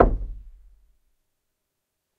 Door Knock - 11
Knocking, tapping, and hitting closed wooden door. Recorded on Zoom ZH1, denoised with iZotope RX.